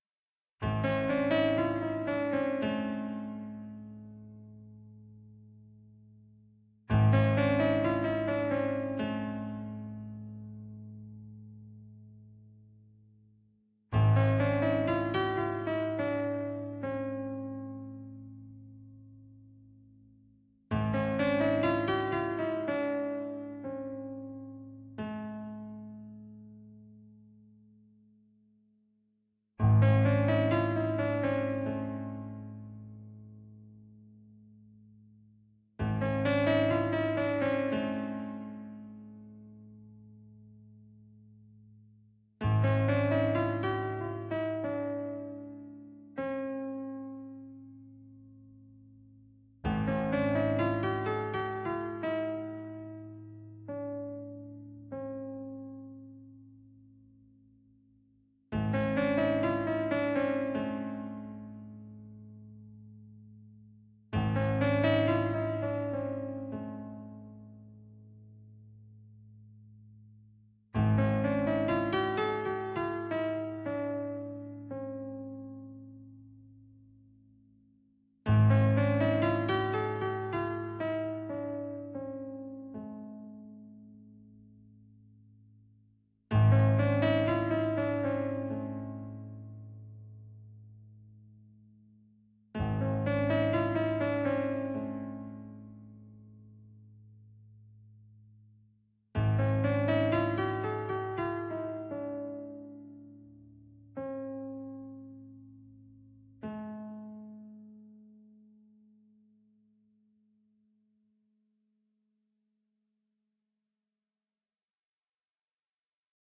Melancholic piano music for a variety of projects.
Created by using a synthesizer and recorded with a Zoom H5. Edited with audacity.